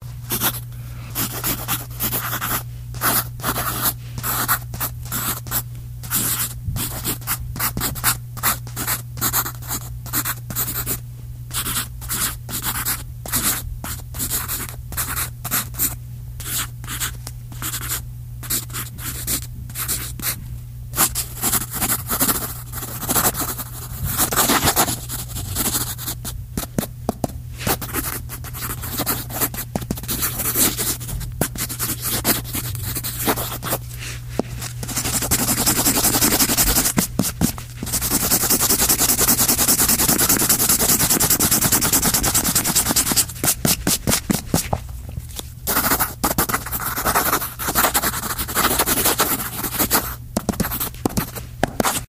Escribir a mano en papel. Handwritten on paper.